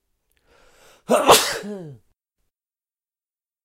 Sneeze Male Speed Normal
When I first tested out my Zoom H5 I began talking into the mic and then all of a sudden I needed a sneeze. I was about to stop the recording but then thought it would be good to record the sneeze to see how it handled my loudness.
Well it coped very well and the recording came out perfect!So, here it is.
I also played around with it in Audacity and slowed it down which makes me sound like a roaring lion. Have a listen to that one too, it's uploaded here!
It's called 'Sneeze_Male_Slowed_-86%'. Enjoy!
male, human, voice, raw, mouth, man, sneeze, Sneezing